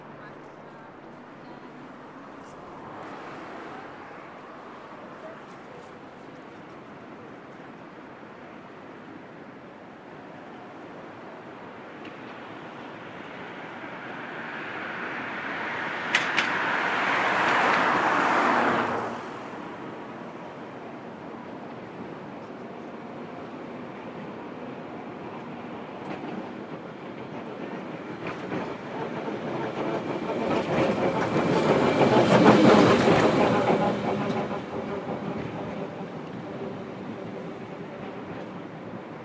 FX - tranvia doppler